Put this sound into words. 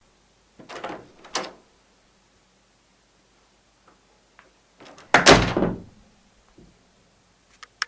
Open/close door forcefully 1
A recording of someone opening a door and forcefully closing it, either out of carelessness or anger. I have left a large amount of blank space in between the opening and closing of the door so that if you want to have it, it is there. But it can always be taken out.
close, closing, door, doors, opening, shut, shutting